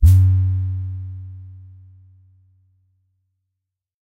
Tonic Electronic Bass Sound
This is an electronic bass sample. It was created using the electronic VST instrument Micro Tonic from Sonic Charge. Ideal for constructing electronic drumloops...
electronic, drum